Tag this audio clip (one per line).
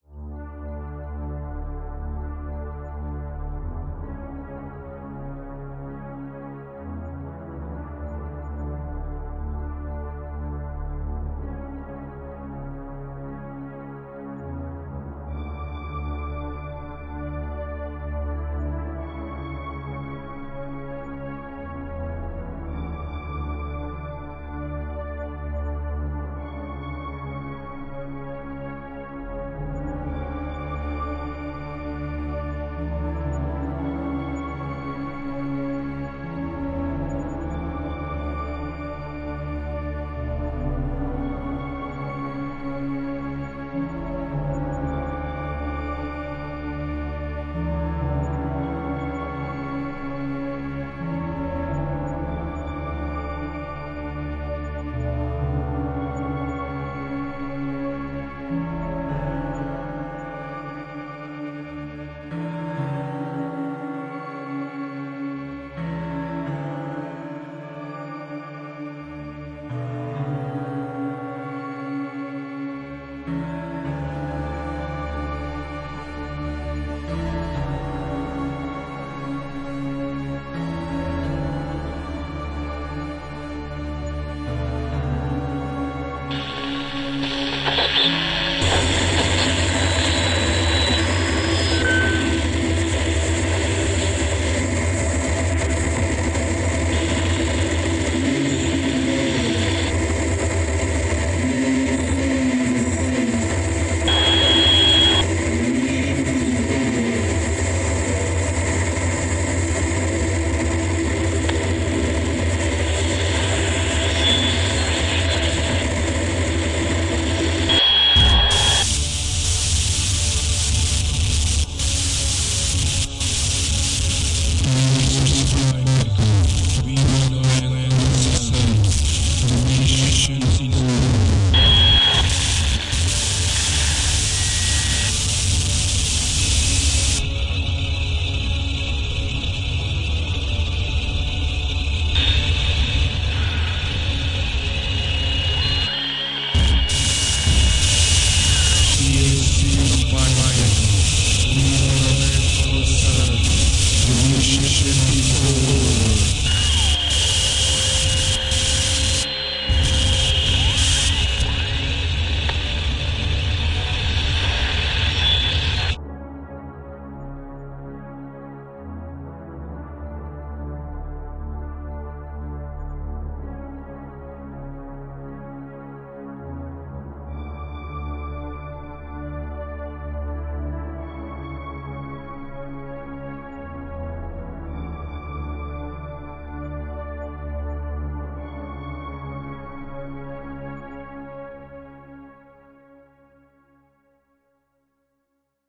space
wave
sounds
future
SUN
star
radio